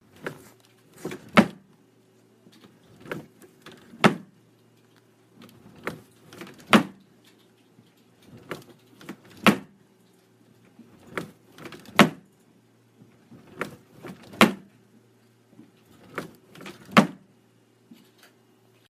This is the sound of a wooden dresser drawer opening and closing.